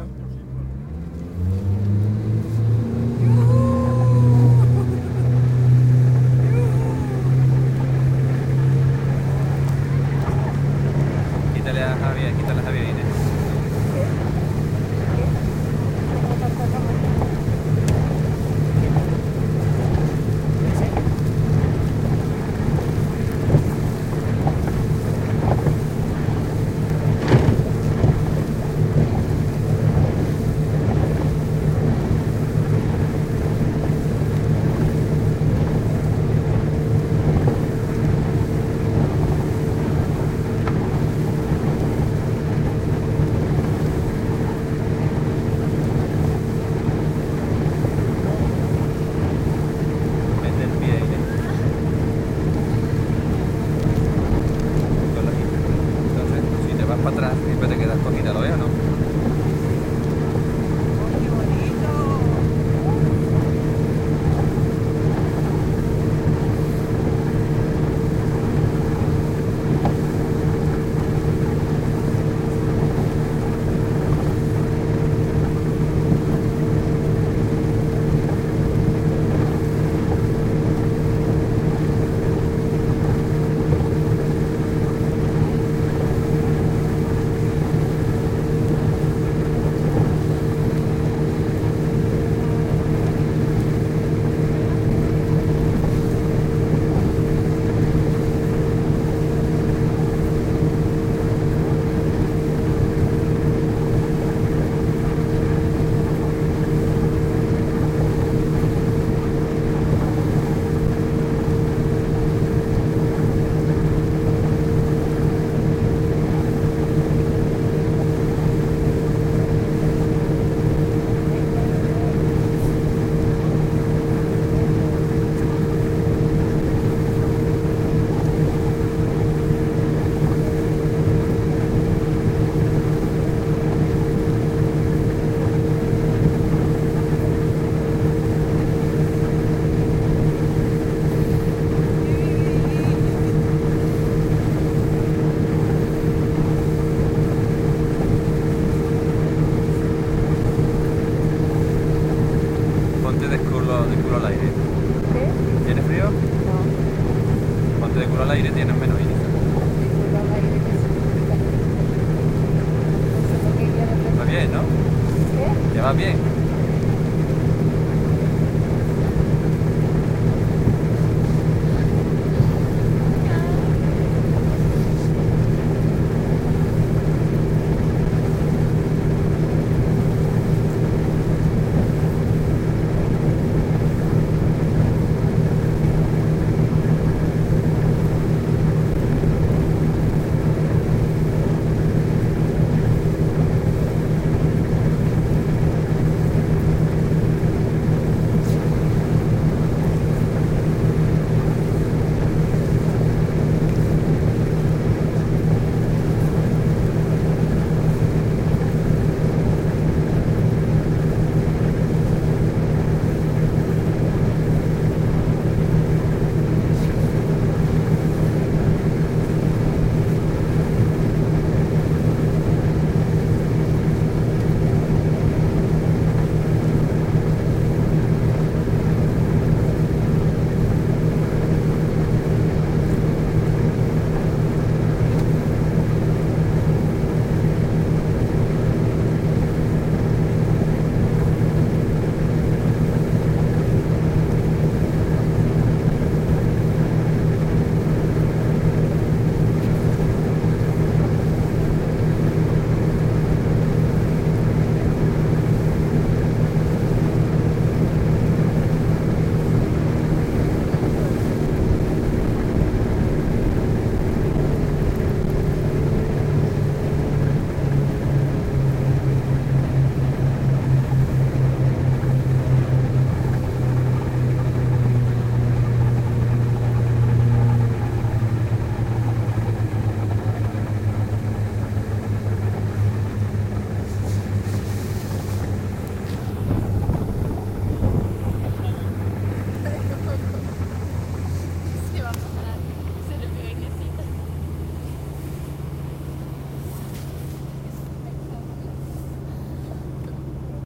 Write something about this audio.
20070815.outboard.motor
noise from an outboard motor in a zodiac boat, along with some waves splashing and voices speaking in Spanish.
field-recording, engine, boat, motor